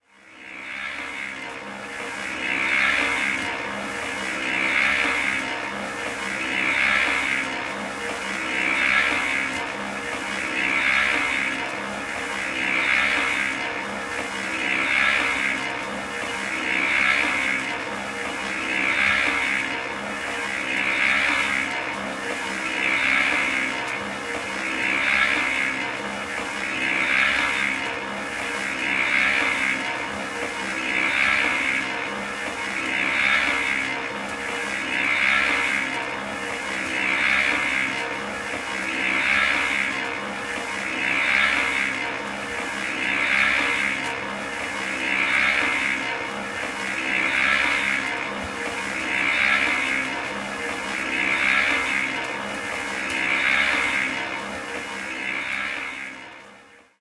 Concrete mixer Front
Concrete mixer, running outside, recorder with a Zoom H2n, Front
concrete, front, mixer, surround